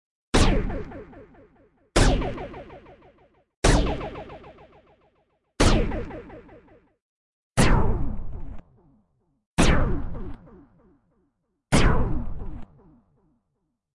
Laser Shots
Laser gun blasts in the vein of old-school cheesy sci-fi, synthesized in Ableton. Various pitches.
lazer, sci-fi, alien, zap, synthesis, Ableton, weapon